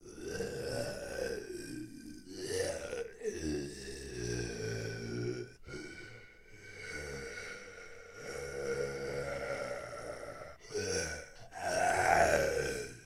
Part of a screaming mutant I made for a student-game from 2017 called The Ridge.
Inspired by the normal zombies in Left 4 Dead.
Recorded with Audacity, my voice, friends and too much free-time.